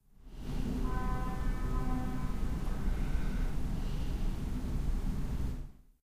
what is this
About one kilometre from my house a train is passing blowing it's horn. It's far past midnight and I am asleep but switched on my Edirol-R09 when I went to bed.
breath, traffic, street-noise, noise, household, bed, street, human, body, nature, field-recording, train